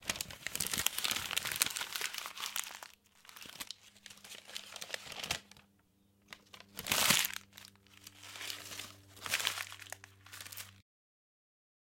paper crumble
paper being crumbled
OWI, crumble, paper